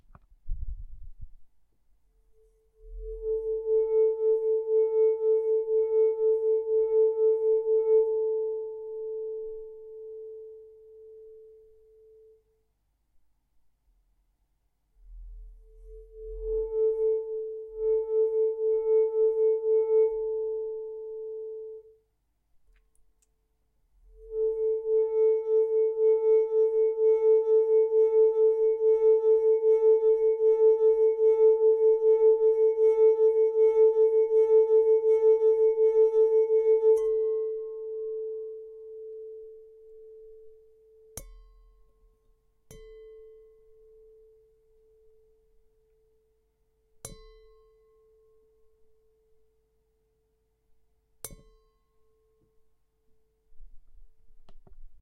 a recording of playing on a glass with a zoom recorder
crystal, glass, mystic, singing